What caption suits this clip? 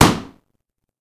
Putting a needle into a balloon makes this sound and no other.